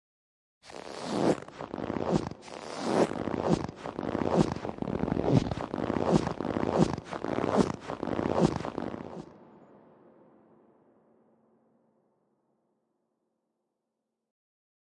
SNOW-STEP-CRUNCH
06.22.16: Crunchy footsteps in fresh snow.
frost, walking, snow, feet, freeze, running, crunch, ice, foley, steps, walk, footstep, footsteps, winter, step, foot